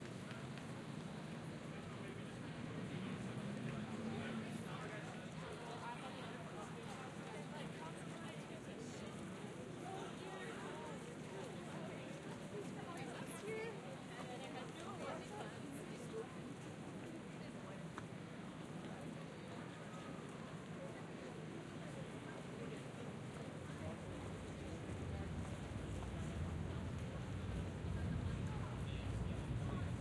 Crowd Noise 3
A selection of ambiences taken from Glasgow City centre throughout the day on a holiday weekend,
Glasgow, Zoom, H6n, walla, Ambience, crowd, field-recording, city